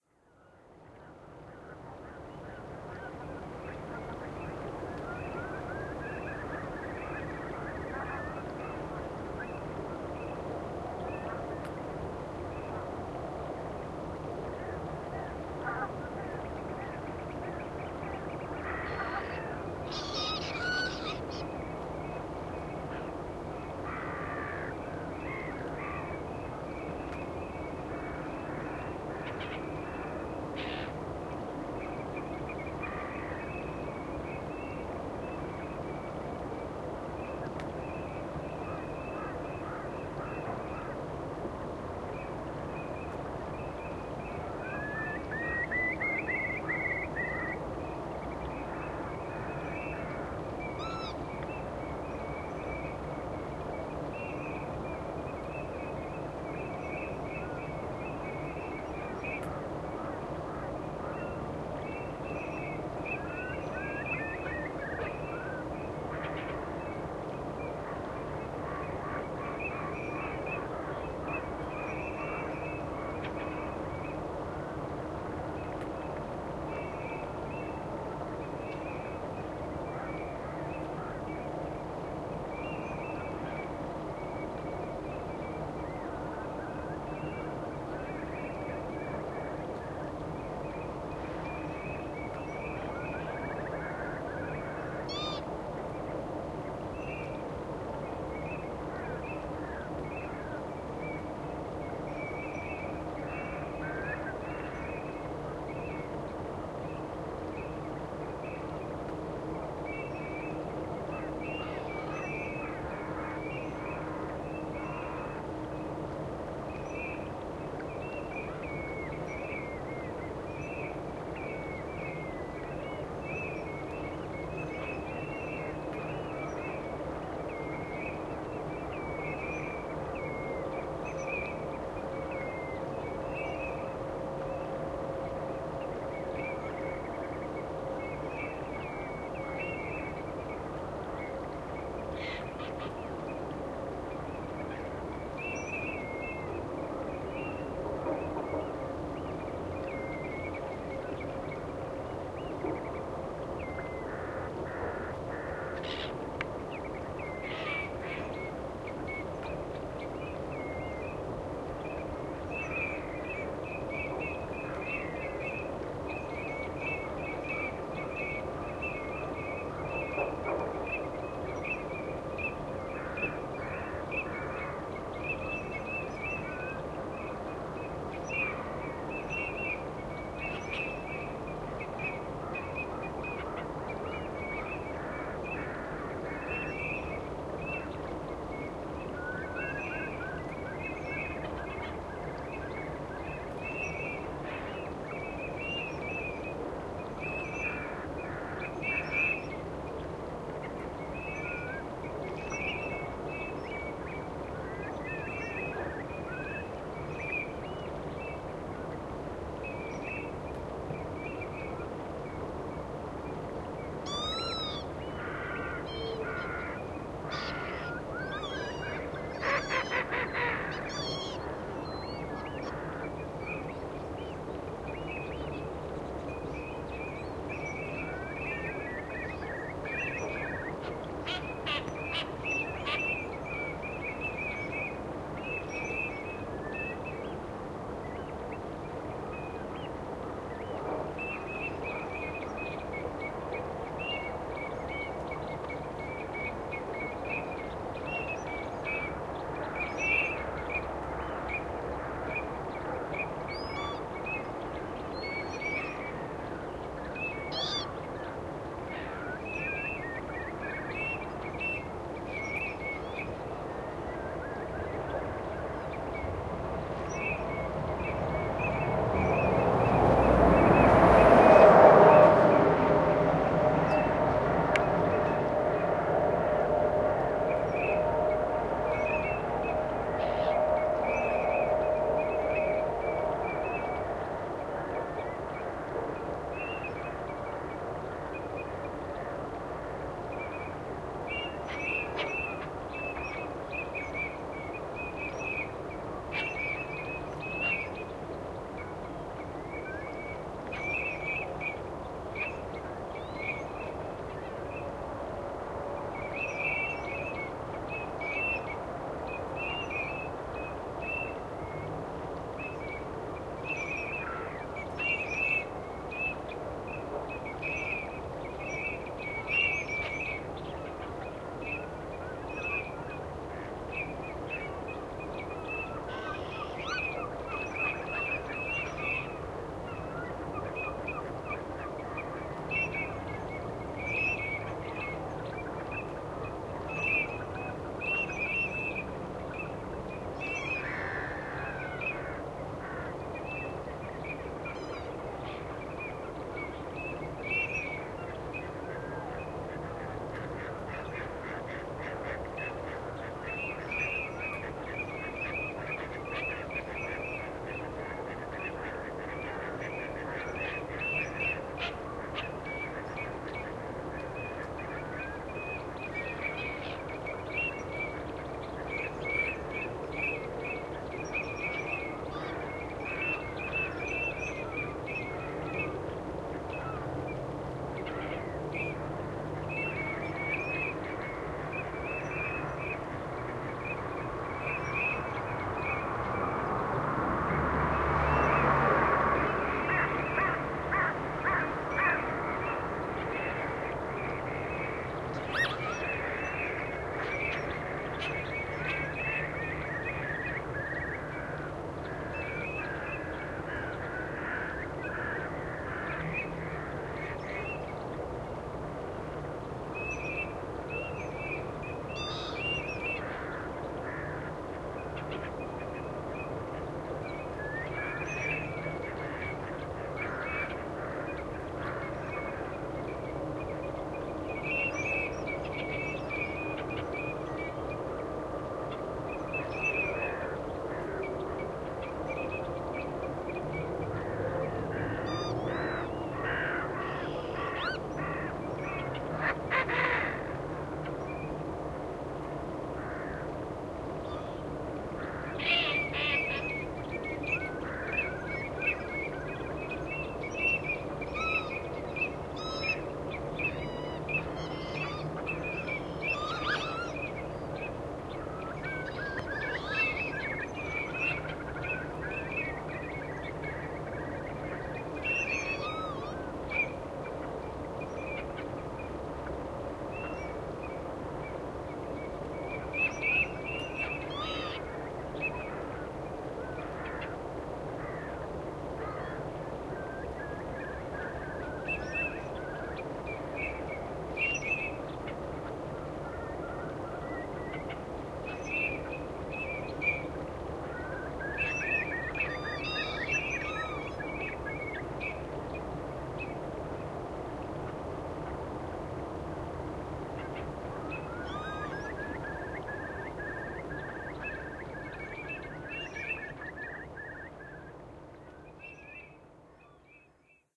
udale-bay
Recording of upcoming tide at Udale Bay with its wonderful birds. You can hear many wading birds, ducks, lapwing amongst others. Recorded using Zoom H1 V2 and edited in Audacity.